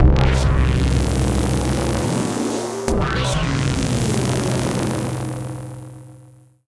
FCB NyolcnutSFX 01
detritus, fx, long, sound-design, sounddesign, soundeffect